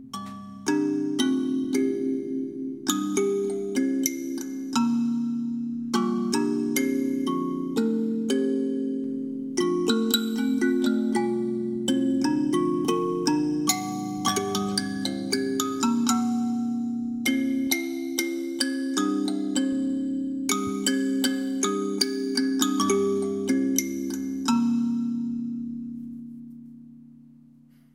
kalimba pensive
Kalimba original from Rocktopus (79881) - lovely simple pensive feel. I took the original and intercut it to make a more continual feel.
pensive, kalimba